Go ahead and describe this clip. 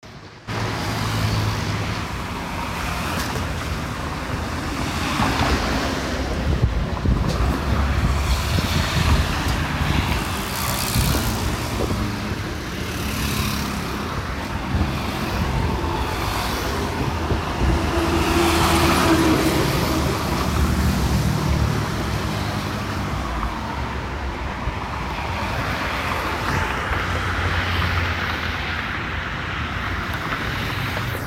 Audio grabado en la cra 30 3pm